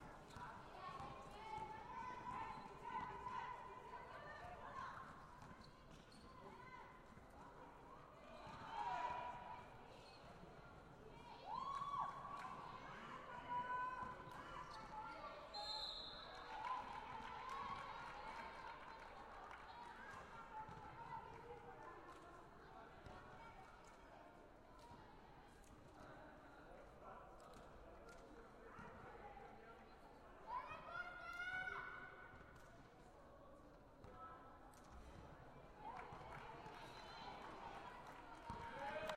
Omni Ambiental BasketBallGame2
Basketball, game